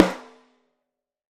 These are samples of the horrible cheap 1950s 14x4" snare drum that I bought off a garage sale for $5 bucks. I was phase-checking some Lawson L251 mics with M7 capsules when I captured these samples. The preamp was NPNG and all sources were recorded flat into Pro Tools via Frontier Design Group converters. Samples were processed in Cool Edit Pro.